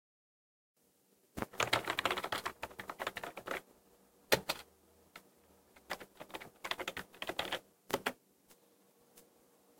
Typical keyboard typing, with a Spacebar and an Enter strike.
Keyboard-typing, PC-keyboard, keyboard, keystroke, keystrokes, type, typing